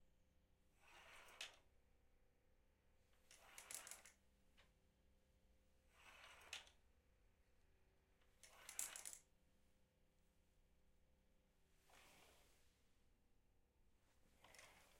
Opening Curtain
This is the sound of a curtain being opened and closed multiple times. Recorded with Zoom H6 Stereo Microphone. Recorded with Nvidia High Definition Audio Drivers. This effect was post processed to reduce background noise.
Curtain, Curtain-Opening, OWI